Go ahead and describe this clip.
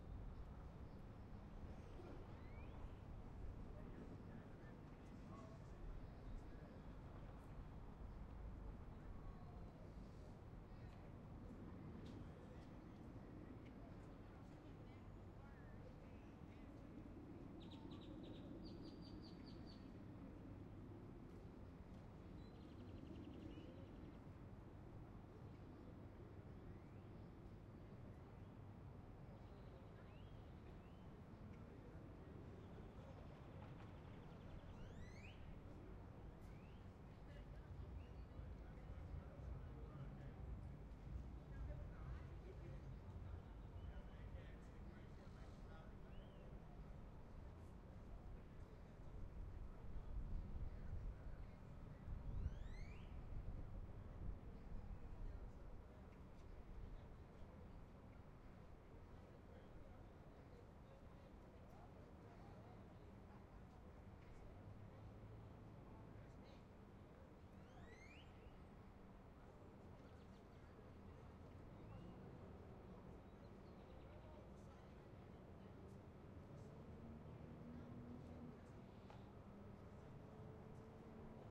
Part of the Dallas/Toulon Soundscape Exchange Project
Date: 4-5-2011
Location: Dallas, West End Station
Temporal Density: 2
Polyphonic Density: 2
Busyness: 3
Chaos: 2
ambience, birds, quiet, train-station